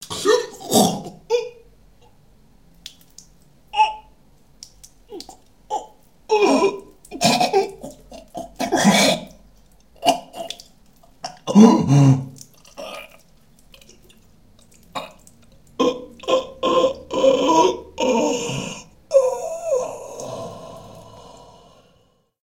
Slit Throat
I used dripping water for the blood effect.
sfx, soundeffect, troat-slice